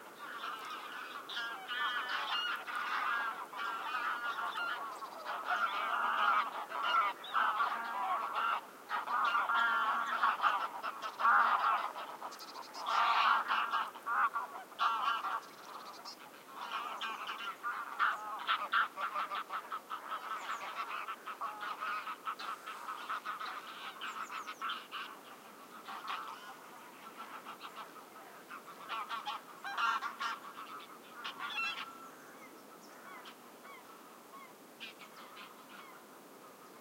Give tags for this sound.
ambience
Sounds
geese
ambiance
ambient
general-noise
flying
birds
nature
goose
wings
spring
bird
field-recording
bird-sea
birdsong

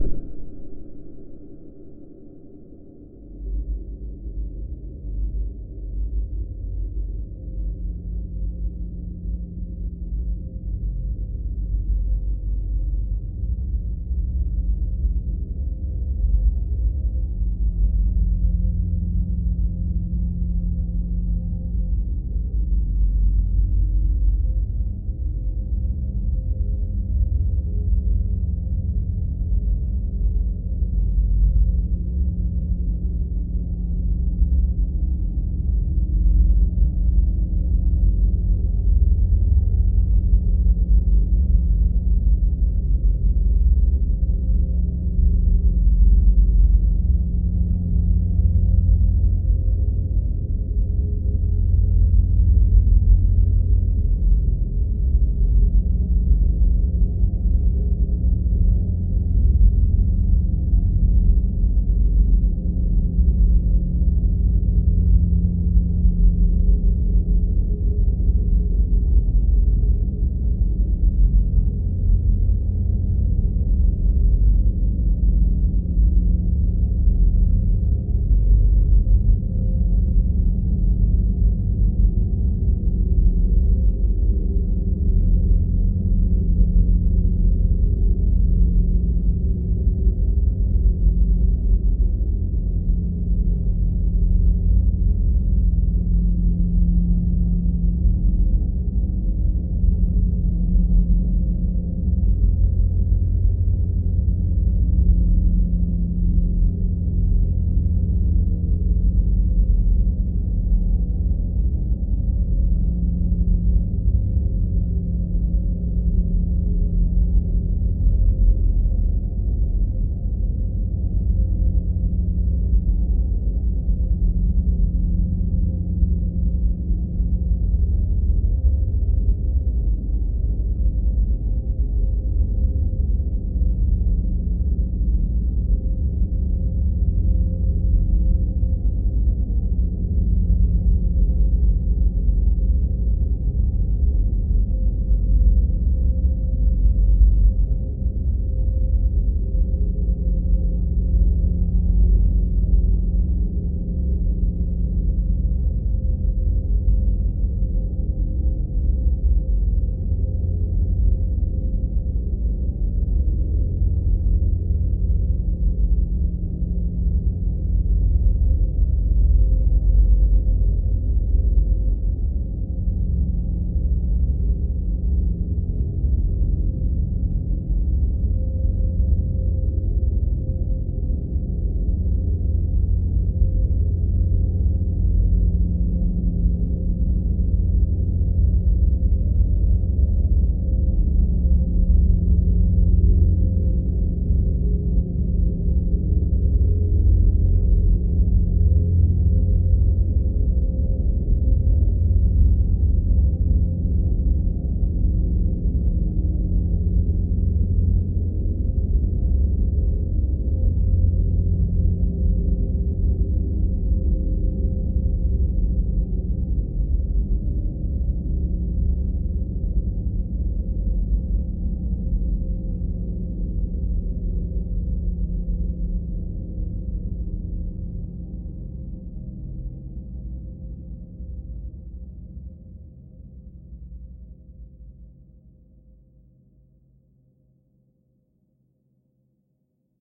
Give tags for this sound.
ambient
artificial
divine
dreamy
drone
evolving
multisample
pad
smooth
soundscape

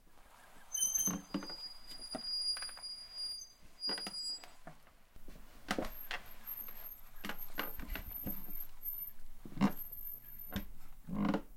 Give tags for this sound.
Auto
Brakes
car
Free
High-Pitched
Screech
Squeak
Squeal
Travel

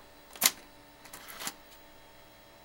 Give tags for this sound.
disc
drive
floppy
floppydisc
floppydrive
removing

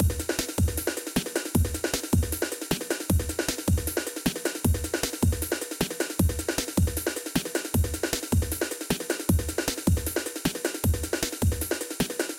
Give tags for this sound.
braindance
free
drum-loop
idm
beat
electronica

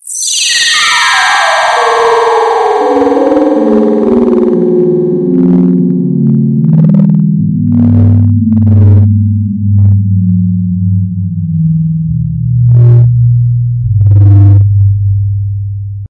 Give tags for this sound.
aliens
beat
computer
flatulation
flatulence
gas
laser
noise
poot
ship
space
weird

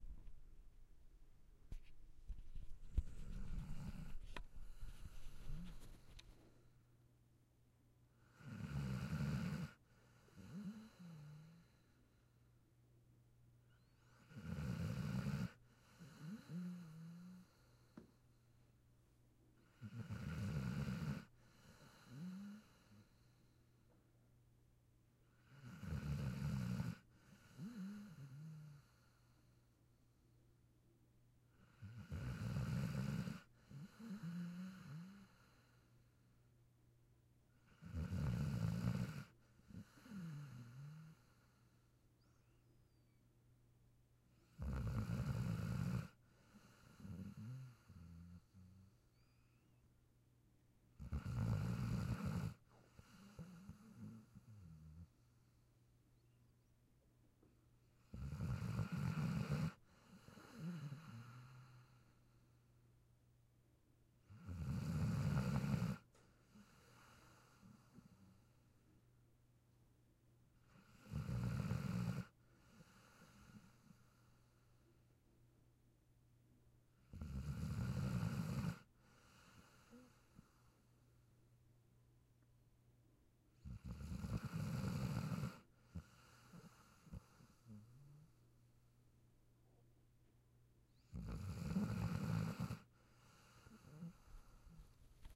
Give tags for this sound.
dog
doggie
doggo
doggy
living-room
nasal
pooch
sleep
sleeping
snore
snoring

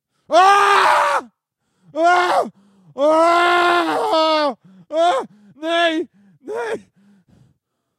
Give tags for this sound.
cry
scream
shouting
voice
yell

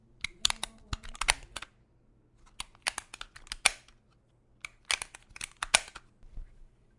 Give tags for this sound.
grampeador
Stapler
indoor

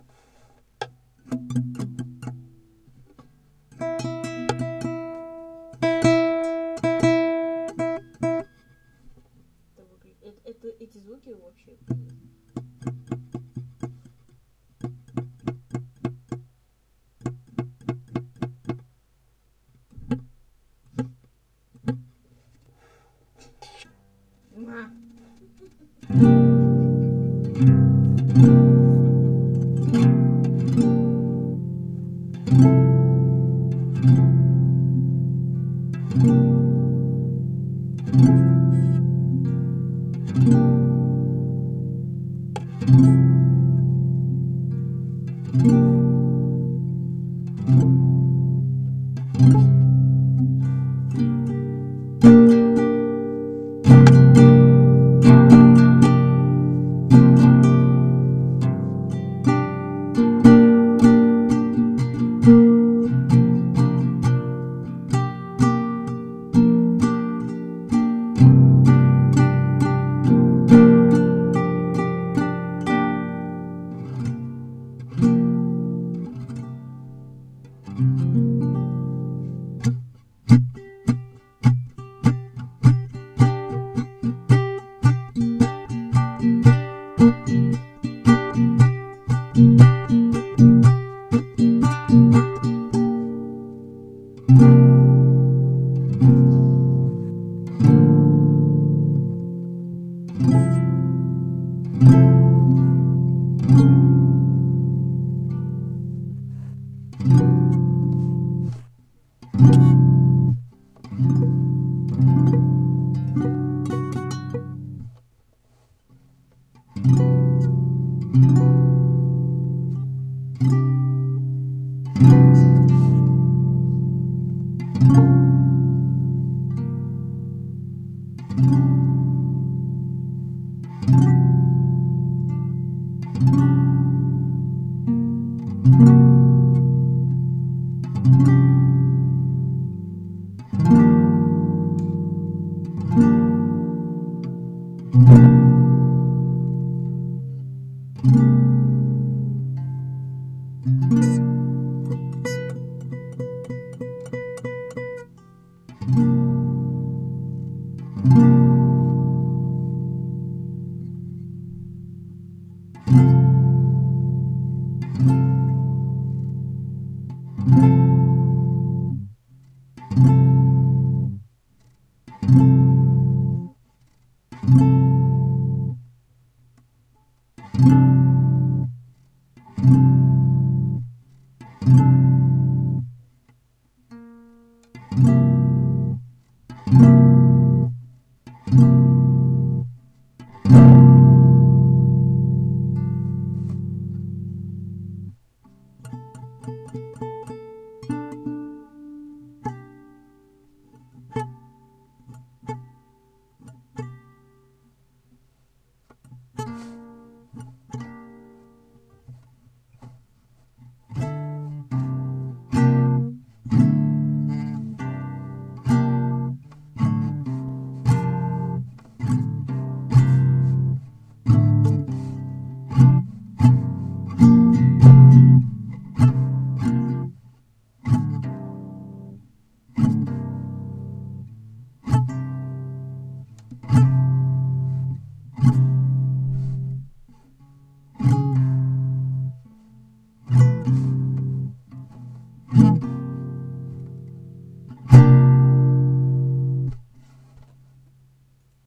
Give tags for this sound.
many-sounds
improvisation
guitar

